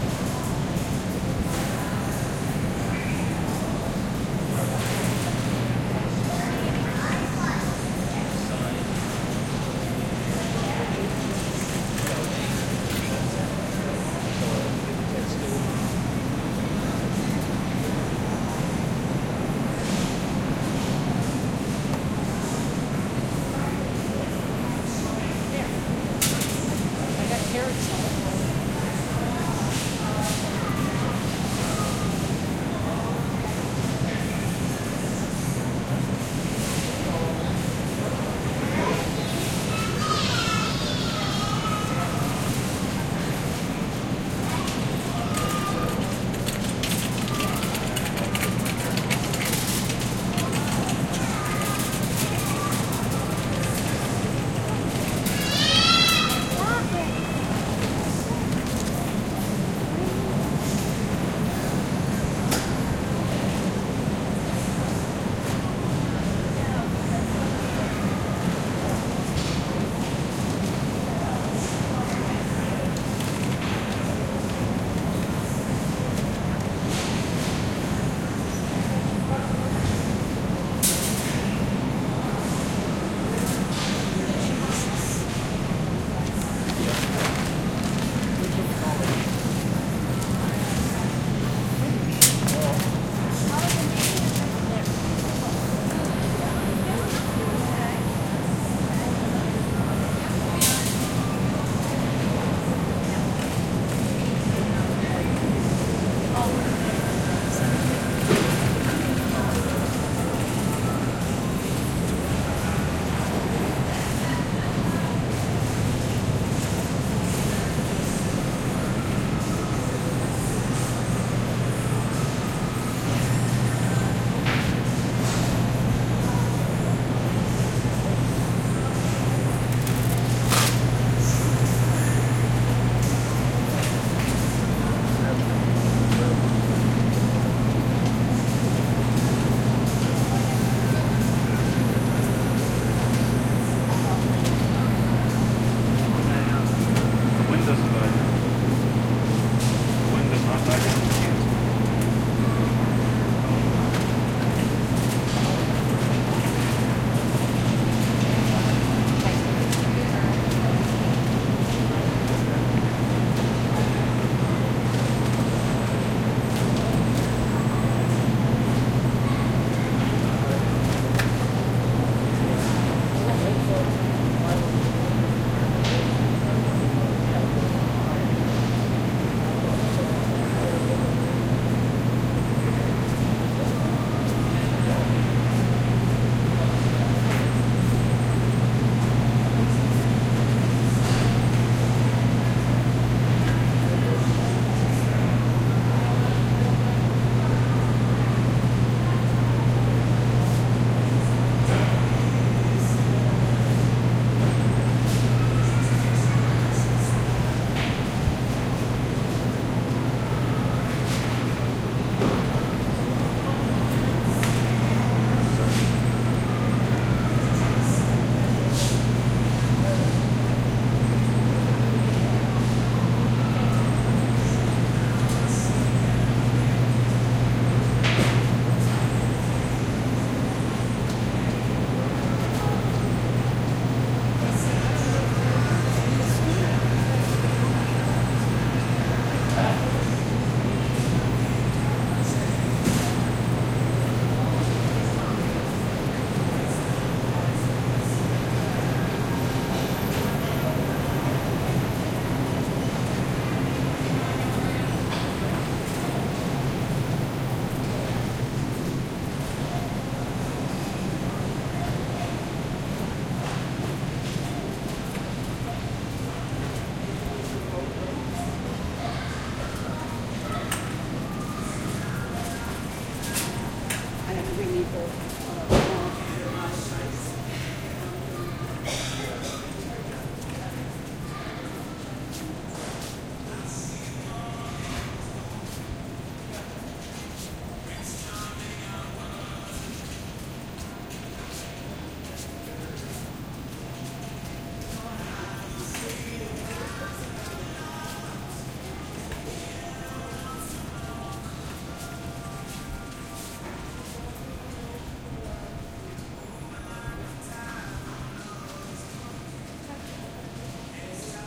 For most of this recording, my girlfriend and I were in the meat section so for most of this recording the sound is dominated by the sound of the refrigerators in addition to the cart and customer ambience.
Nice sound of a cart passing at 0:50.
At 3:31, my girlfriend and I start heading away from the meat section; by 4:15, refrigerator sound starts to fade until the last 30 seconds or so of the recording is mostly supermarket ambience without the refrigerator sound.
ambient, ambiance, atmosphere, supermarket, field-recording, background, soundscape, ambience, background-sound